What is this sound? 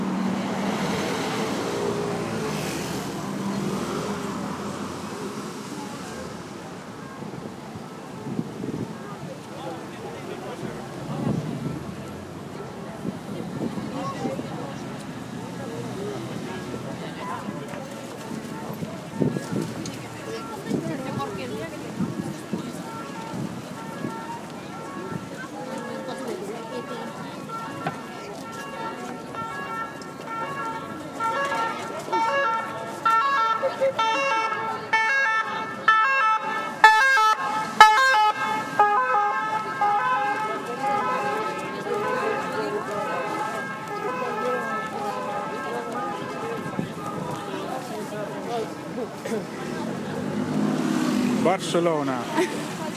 Barcelona near the harbour and the Ramblas. Ambulance came from far and drove by. Crowded and city sounds. field recording with Iphone and FiRe app.
street, recording, ambulance, barcelona, pedestrians, passing, by, field